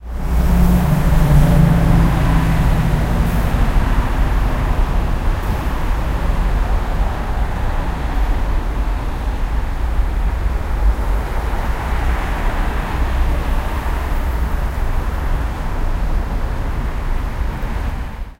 Traffic few cars
20120116
traffic, seoul, field-recording, korea